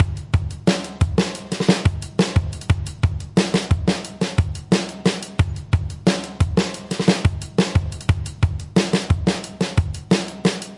honey break mgreel
Formatted for the Make Noise Morphagene.
This reel consists of a spliced breakbeat. The final splice is the whole loop without any splices.
Trashy drum kit, recorded by me, re-sequenced with Elektron Octatrack.
breakbeat, over-compressed, morphagene, mgreel